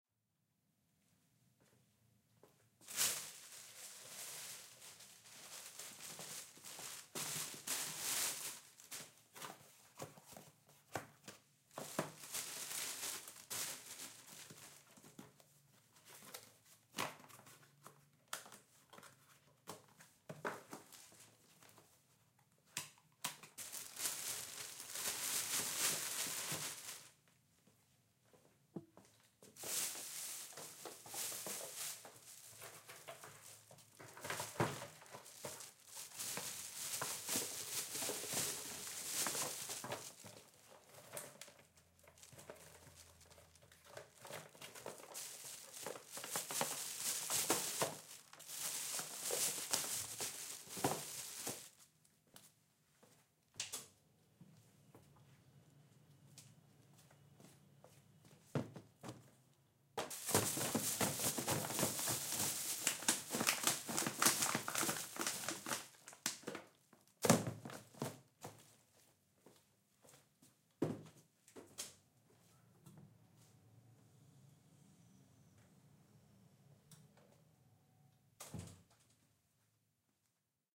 Bunyi no.8 buang sampah
trowing garbage ambience
garbage, sandyrb, rubbish, cleaner